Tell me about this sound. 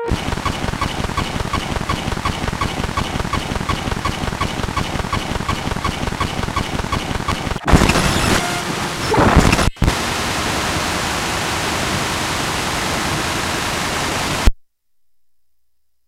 long radar glitch hiss
Casio CA110 circuit bent and fed into mic input on Mac. Trimmed with Audacity. No effects.
Table, Hooter, Bent, Casio, Circuit